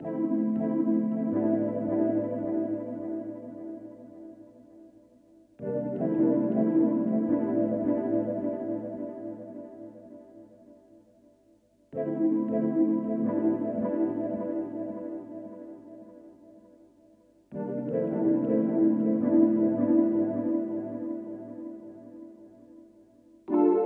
dnb progression 5 (consolidated)
cool chord thing i made in fl studio
atmospheric, reverb, chord